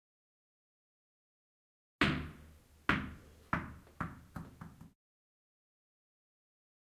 10-2 a tennis ball
bouncing tennis ball
CZ, Czech